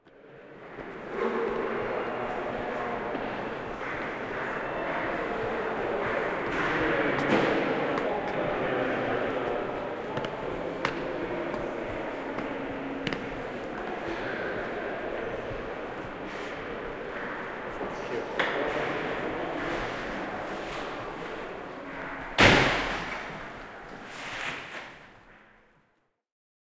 br mus tk3 steps door
Footsteps on the great staircases of the British Museum in London. There are voices and lots of natural reverb due to the vast size and hard surfaces. There is also a general background noise from ventilation and heating systems. Minidisc recording May 2008.
museum, ambience, voices, field-recording, staircase, stairwell, british-museum, footsteps, atmosphere, steps